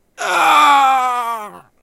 Male Death 01
Recorded by mouth